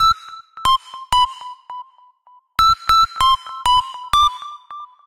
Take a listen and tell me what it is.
Live Yukko Whistle Synth 02 94BPM
live yukko whistle synth
live, synth, whistle, yukko